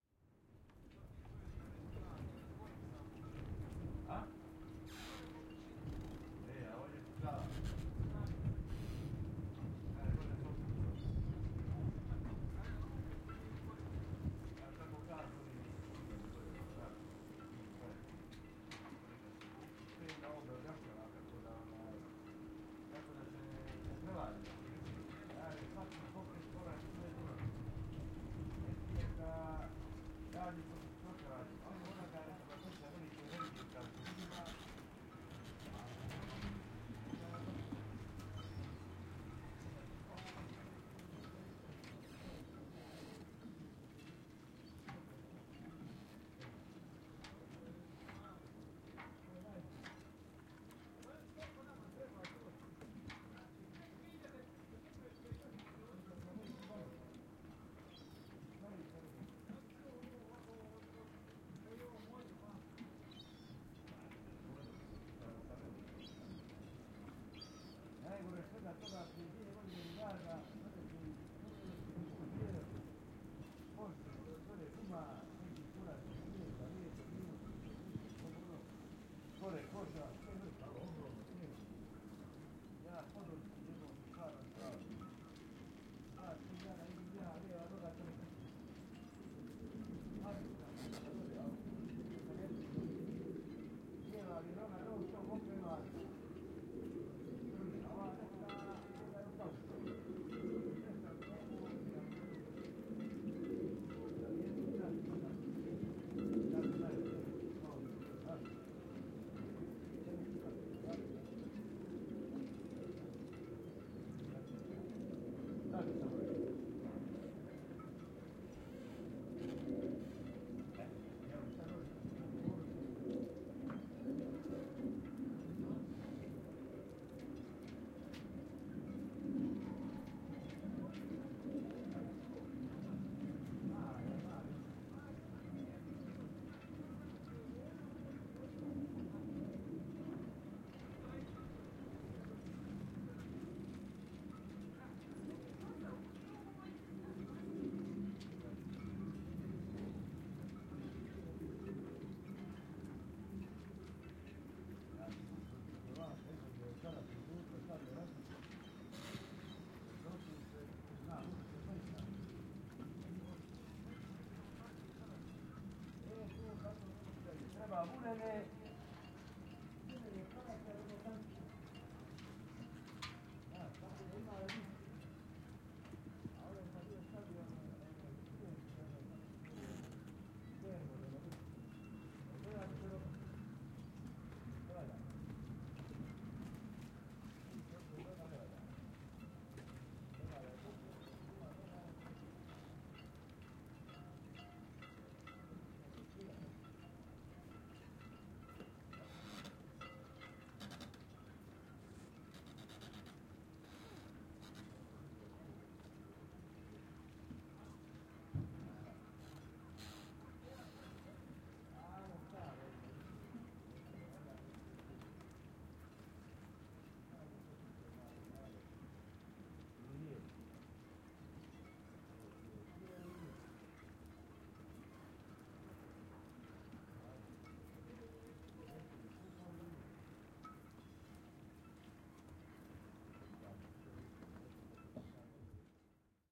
Atmos - Harbour, mast ringing, roaps tightening, fishermen talking
boat, harbour, marina, rig, rigging, ropes, sail